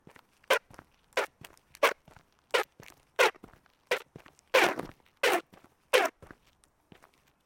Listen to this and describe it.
Outside recording of shoes scrapes on concrete with a KM185, close position.
Foosteps, Shoes, concrete, friction, scraping, scrape, stone, cement, floor